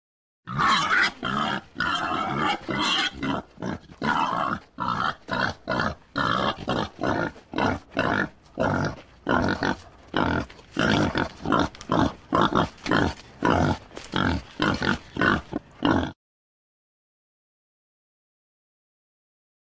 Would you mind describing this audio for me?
Wild Boar / Grunting / Squealing
Wild Boar / Grunting / Squealing
Grunting, Squealing